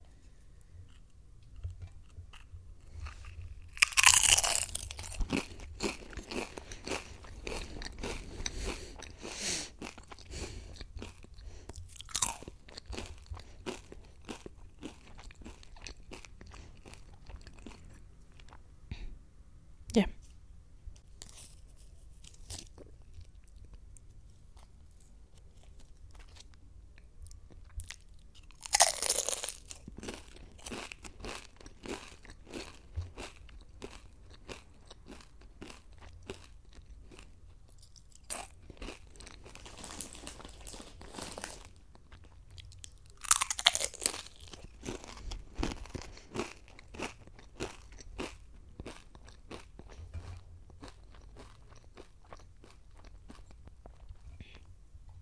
A person chewing some potato chips. Una persona masticando papitas o botana de papas fritas.